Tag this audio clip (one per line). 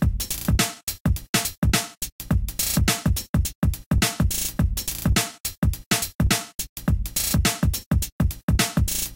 stuff
hiphop